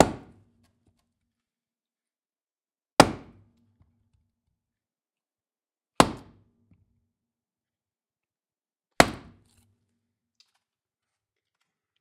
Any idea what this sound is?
Wood - Wood on wood 4 stereo
Tree stump hit four times with a wooden mallet.